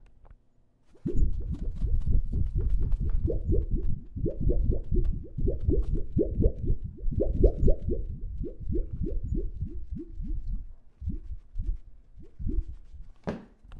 Sound for a spaceship or Prison.